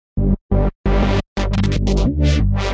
just a short reece loop